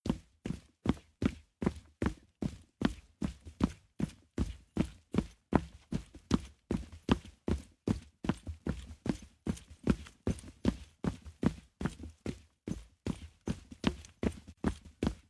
footsteps-wood-bridge-01-running
field-recording, wood, footsteps, running